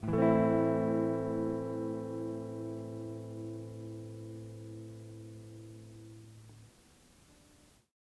Tape El Guitar 12
Lo-fi tape samples at your disposal.
guitar, vintage, mojomills, lofi, el, collab-2, tape, lo-fi, Jordan-Mills